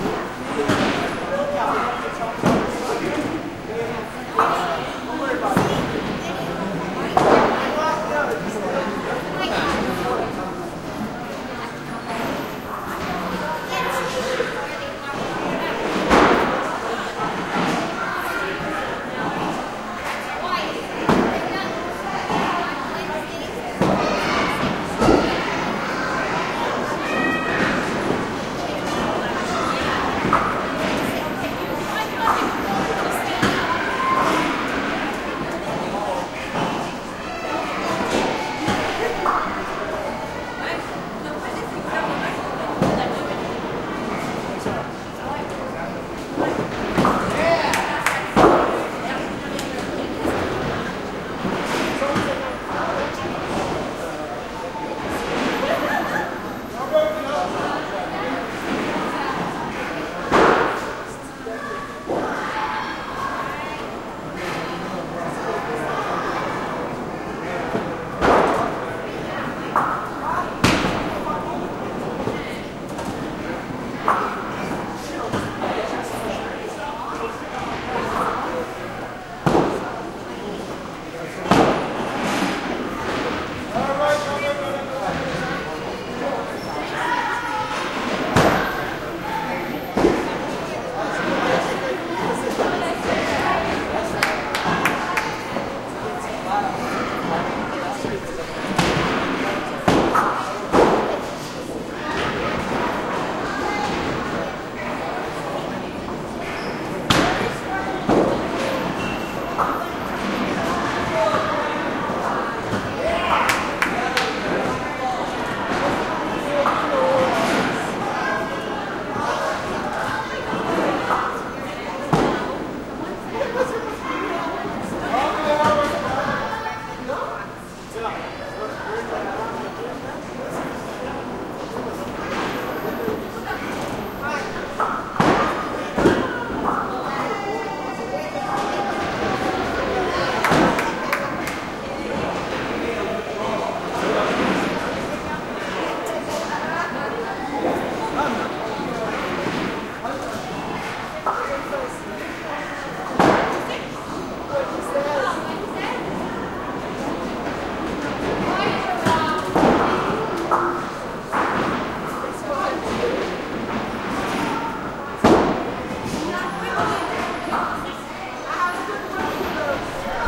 bowling alley small pin medium crowd2
bowling alley small pin medium crowd
crowd, bowling, small, alley, pin, medium